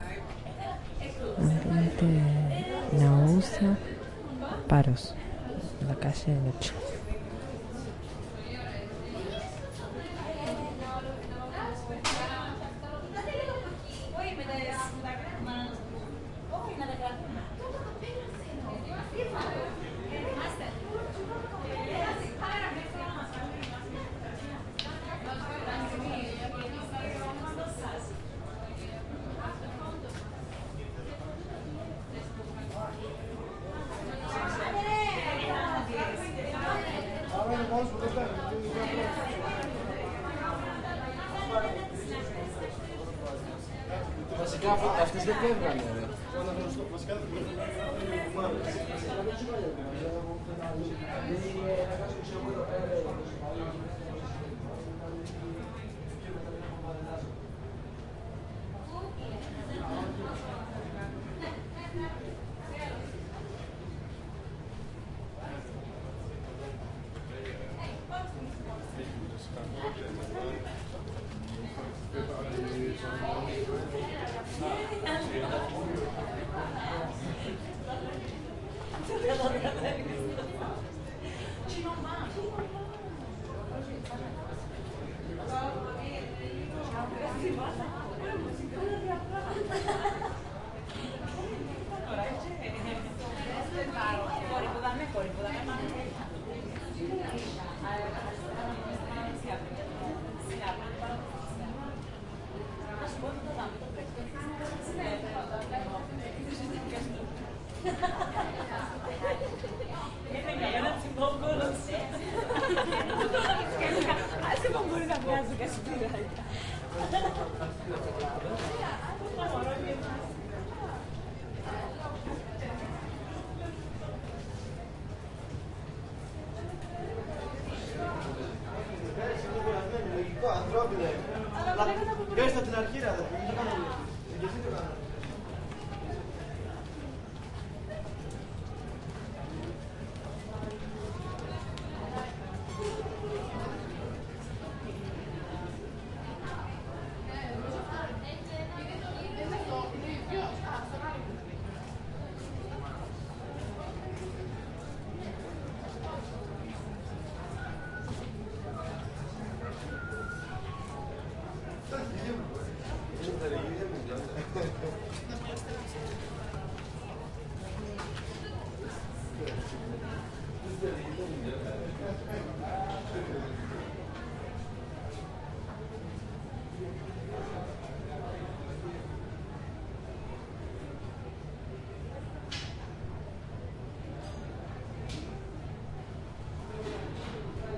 Amb Paros Naoussa ext
Ambiance in a pedestrian street in Naoussa by night recorded with a zoom h4